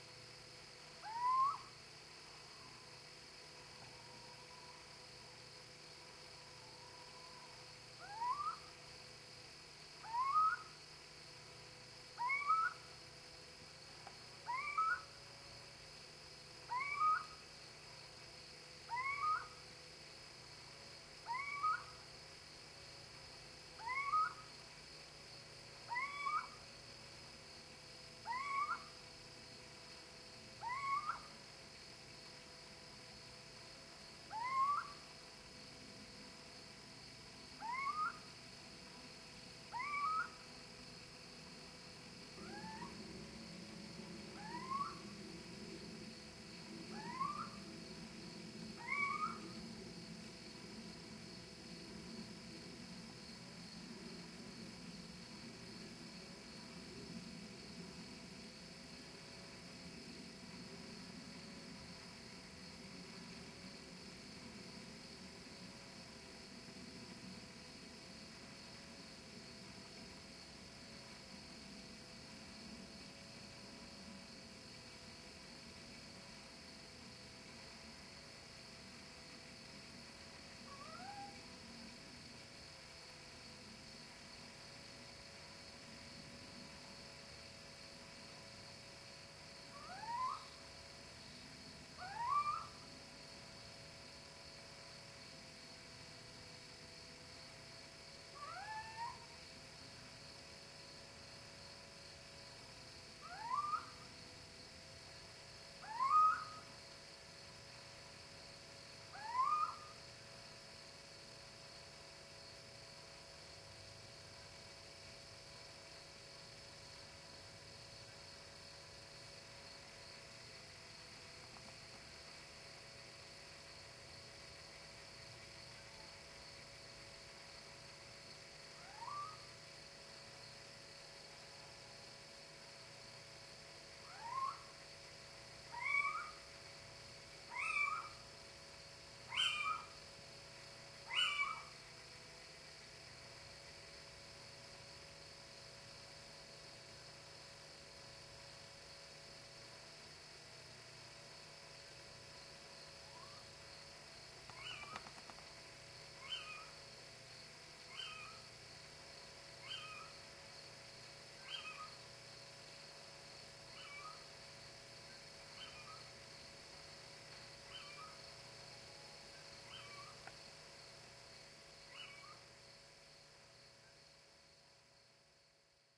A little-owl (Athene noctua) hooting in the summer twilight.
bird, hoot, nature, night, owl, twilight